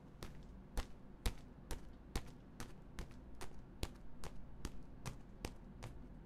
Steps on a rocky surface.